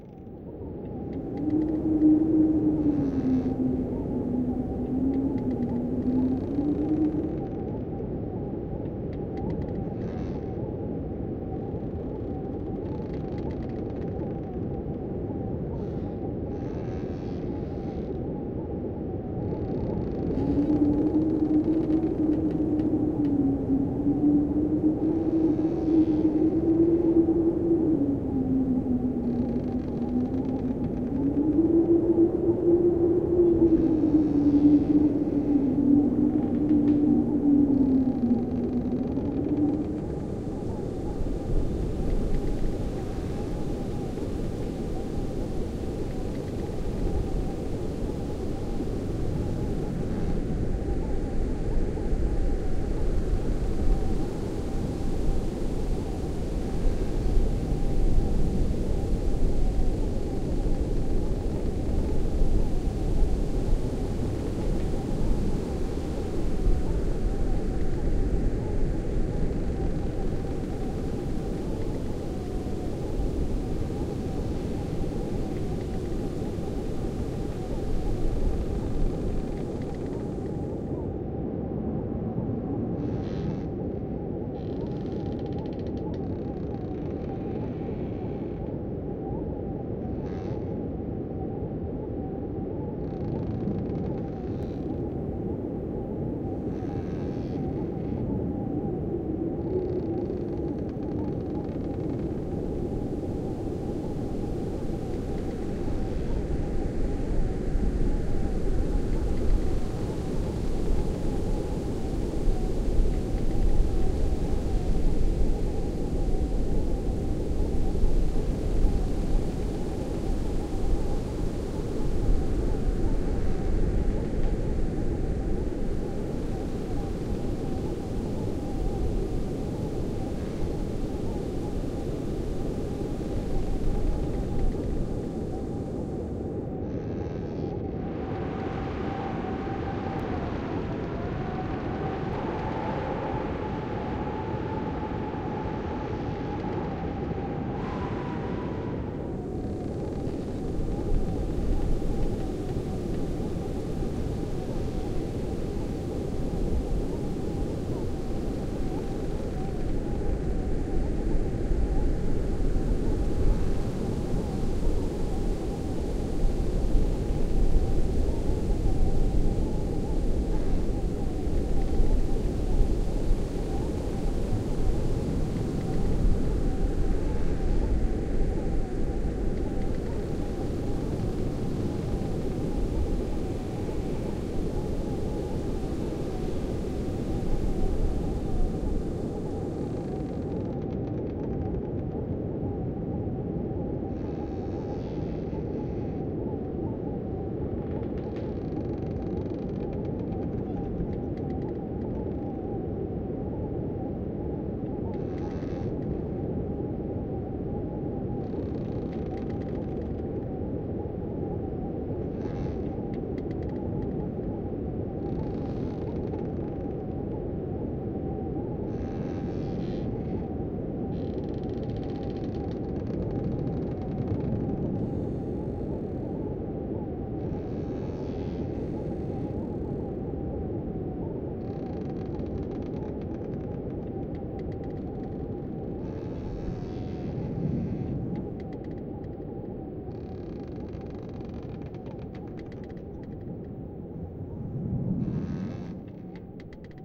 Windy, creaky old house ambience
I mixed together the following two files to make this:
creak, house, old-house, windy